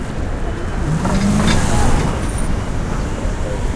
Car Driving Off
noises, drive, nyc, city, car, driving